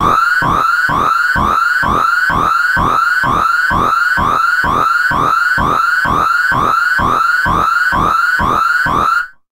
Alien Base Siren - 48-24-mono
A kind of alien base alarm siren
Effect, Siren, Ambiance, Base, Film, Alarm, Noise, Movie, Alien